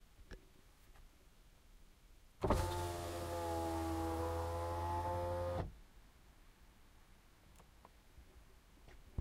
Car window down
Electric car window going down